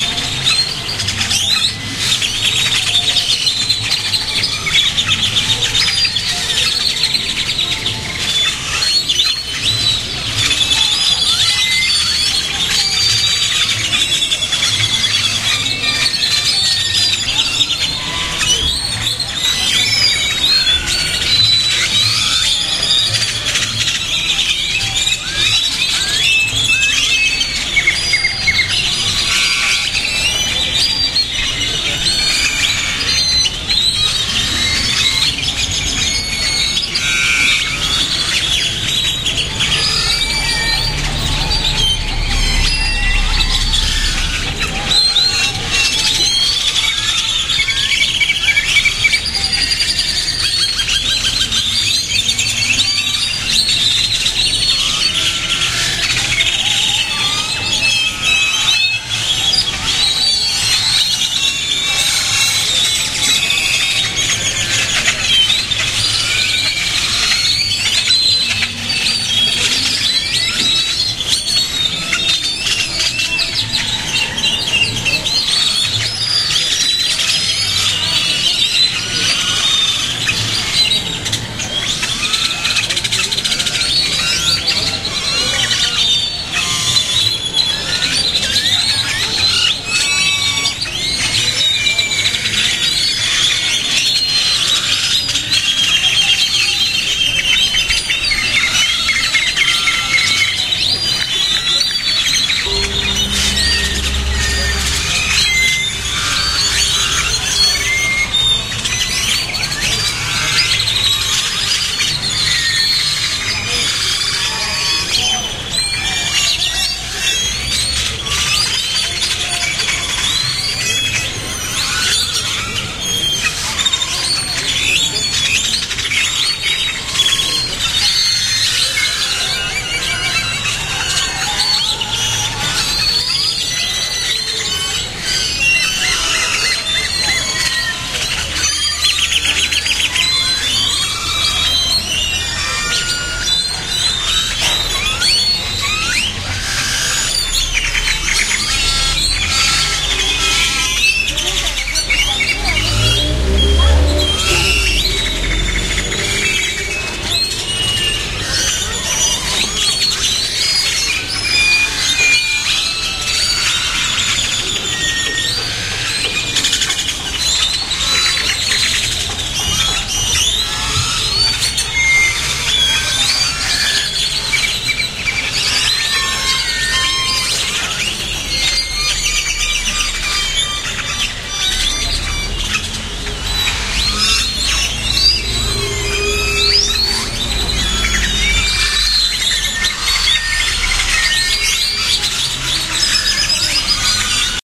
birdsInTree mexico
gathering of uncountable birds in one tree in the village of pochutla, oaxaca, mexico
field-recording, ambient, mexico, binaural, nature